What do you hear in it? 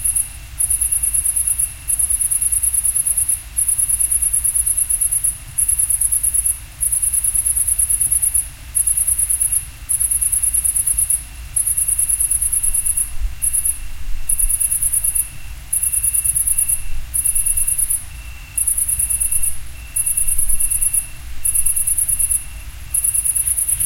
They Respond
Recorded about 10-20 Meters from sound source i guess. There are normal mid-range-crickets in there, too, but the hi-freq ones (Eneopterinae) were especially loud tonight, probably announcing an impending major sunflare.
Make sure to check out the -55cent downpitched version of this recording.
Chirp, Field-Recording, Grillen, Stereo, High-Frequency, 2021, Crickets, Grille, Atmosphere, Data-Sequence, Zirpen, Hi-Res, Sunflare, Alien, Signal, Germany